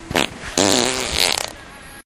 fart poot gas flatulence flatulation explosion noise weird